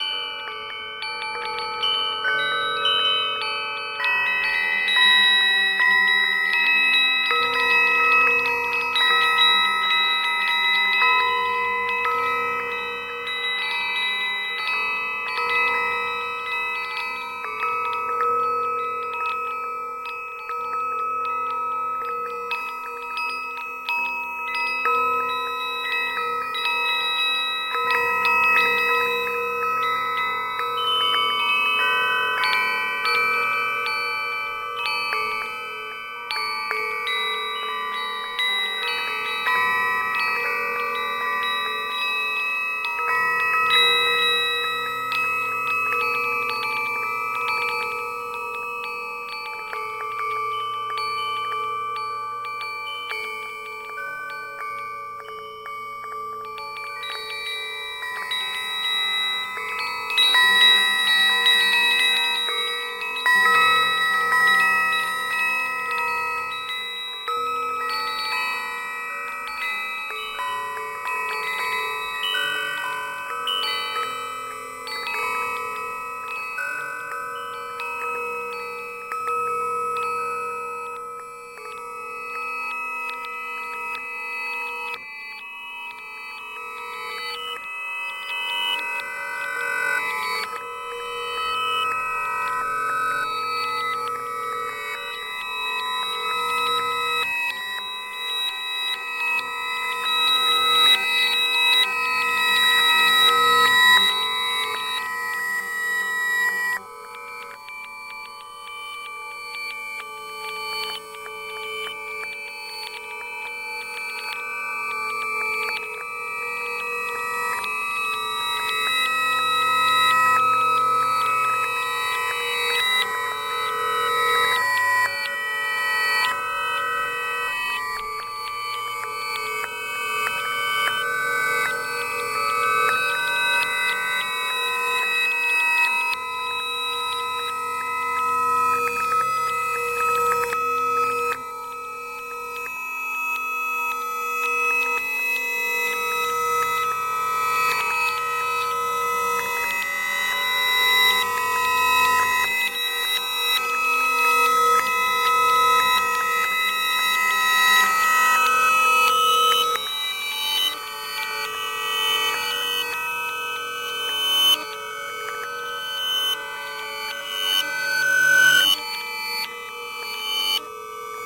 Metal Windchime (Processed) - A MakeNoise Morphagene Reel
A high-pitched, metal windchime, sent through some granular processing software. The reel is divided into two, long halves: one with the sound playing forwards and one with it playing in reverse. This means I can easily switch from forward to reverse without tuning issues, simply by applying CV to the Morphagene's 'Slide' control.
bell, chime, chimes, chiming, clang, ding, fairy, jingle, magic, make-noise, makenoise, metal, metallic, mgreel, morphagene, percussion, ping, reel, ring, ringing, sparkle, sparkly, spell, ting, tinkle, tinkling, tinkly, wind-chime, windchime